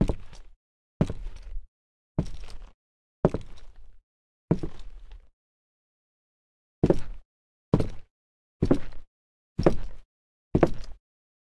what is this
Footsteps Boots Wood Mono
Footsteps sequence on Wood - Boots - Walk (x5) // Run (x5).
If you like this sounds, you can buy full pack (including running, landing, scuffing, etc.)
Gear : Rode NT4.
clean, run, walk, nt4, boots, footstep, rode, foot, wood, floor, walking, shoes, running, feet, h5, foley, recording, zoom, steps, footsteps